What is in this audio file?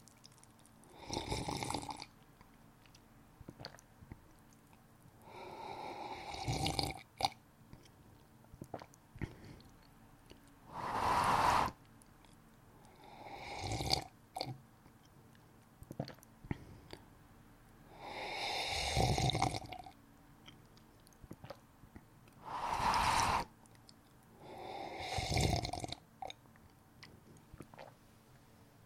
Tea slurping sound close up with some blowing on tea etc, recorded with Rode Procaster Mic.
annoying, drink, drinking, male, slurp, slurping, swallow, tea